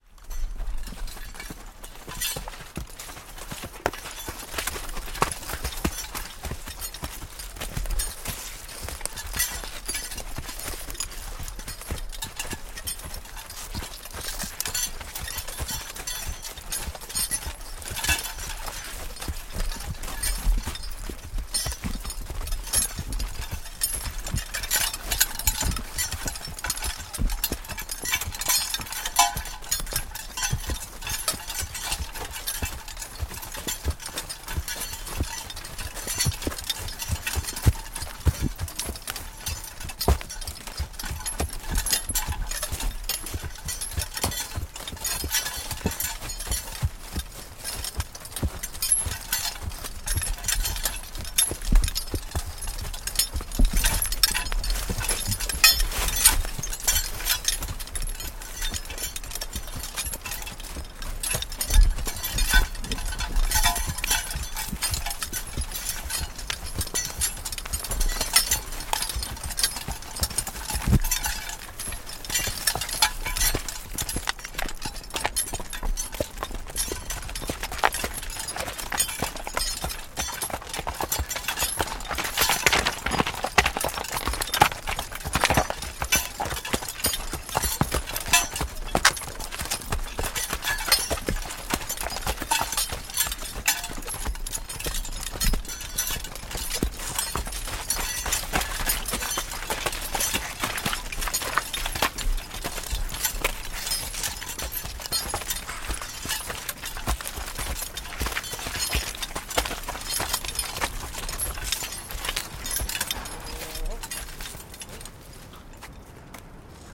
horse steps and chain
Close up of loud horse steps on a forest soil, the a few steps on a road. Rattling of metalic chains.
Frane, jan 2023.
recorded with Shoeps CMC6 MK41
recorded on Sounddevice mixpre6